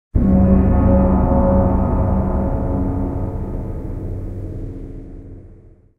gong veilered 16 bit
a full range gong, hies and lows
made by Veiler using many gong files but in the correct amount
a, by, Veiler, files, hies, full, many, recorded, metal, made, gong